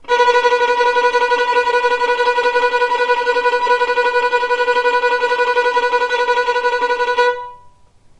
violin tremolo B3
tremolo, violin